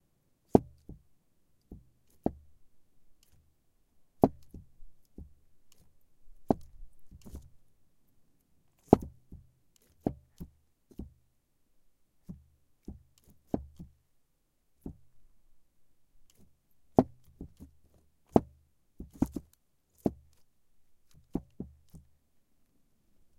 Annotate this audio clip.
Rock-rocking 090714
Recording of a stone rocking on top of another. Tascam DR-100.
field-recording, percussive, rock, rocking, stones